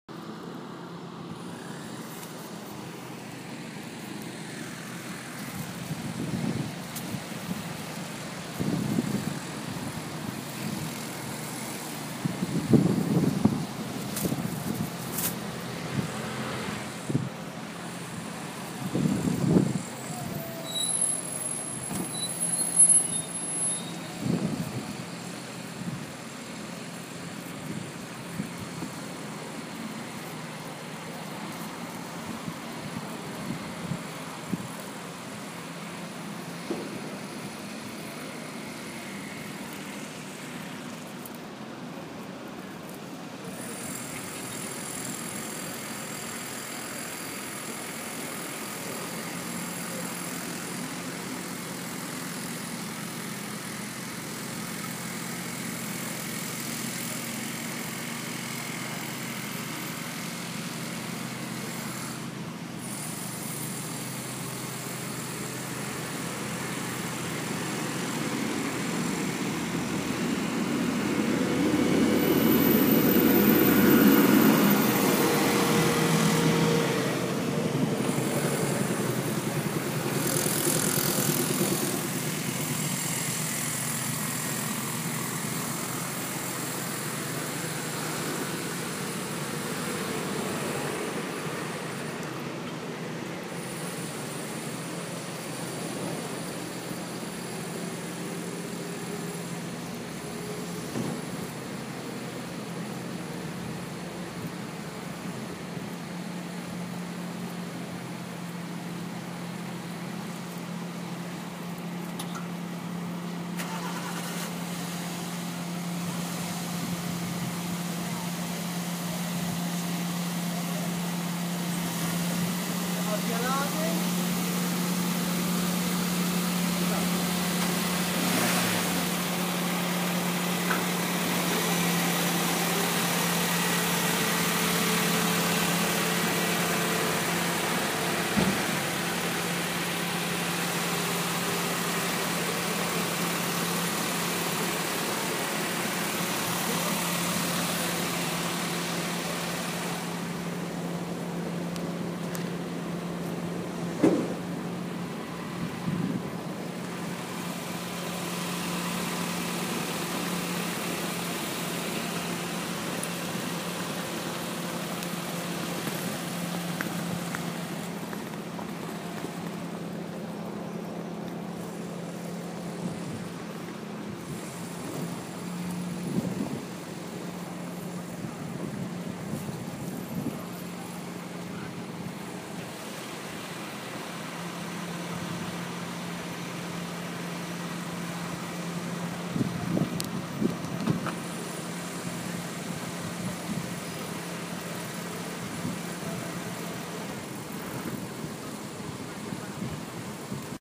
VIIIagosto/h24 lunedi 10:00 bySen
Progetto di rivisitazione di Piazza VIII Agosto a Bologna realizzata dal gruppo di studio dell'Accademia delle Belle Arti corso "progetto di interventi urbani e territoriali" del prof. Gino Gianuizzi con la collaborazione di Ilaria Mancino per l'analisi e elaborazione del paesaggio sonoro.
Questa registrazione è stata fatta lunedi di Maggio alle ore 10:00 da Sen